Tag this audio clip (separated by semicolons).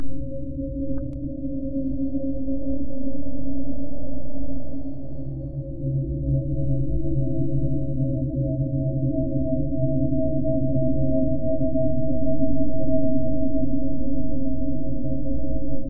ambient; drone; loop; space; wind